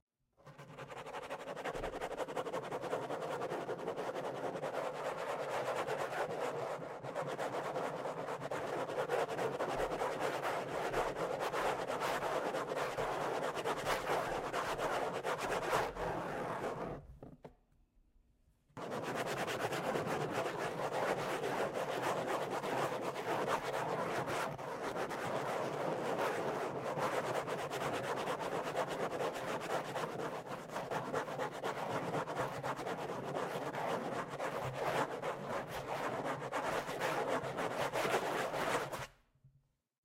maryam sounds 3
This is a third recording of my nails scratching the plastic back of a chair, except much faster.
fingernails, MTC500-M002-s14, plastic, scraping, scratch